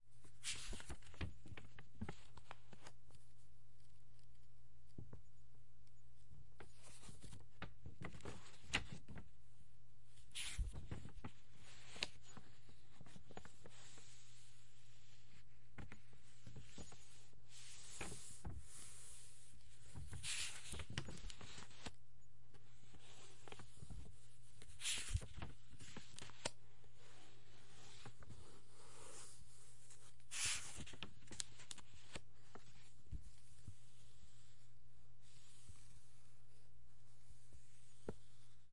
Book Turning Pages 03
Someone turning pages.
turning, paper, pages, book, page, turn